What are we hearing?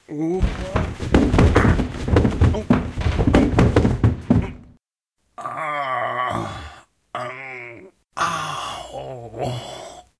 A stereo foley of a man falling down a flight of wooden stairs.